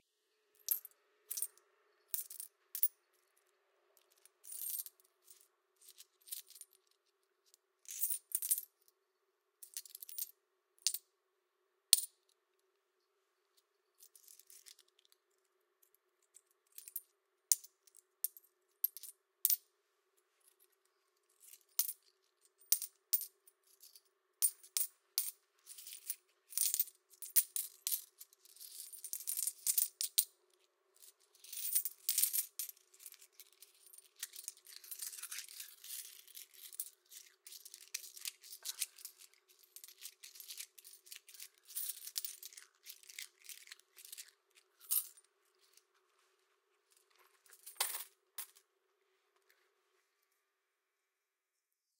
Int-USMetalcoinsmoving
US minted coins are moved between hands and rubbed together for their sibilance.
coins, metal, moving